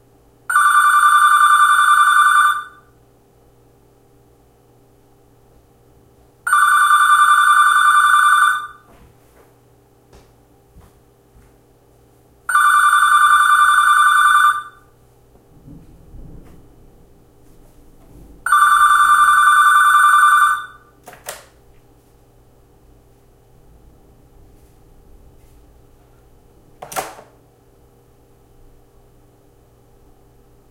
Recorded a land-line phone as I called it. Recorded with r-05 built in microphones. If you download and fast forward through the recording, you will notice something rather, odd.
incoming-call, land-line, ringing, land, ring, phone, telephone, annoying, line, loud